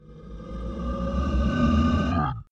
necro-spawn - Csh
A creepy spawn sound. C sharp.
necromancer, spawn